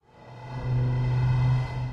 Me blowing in a bottle, pretty low quality sound.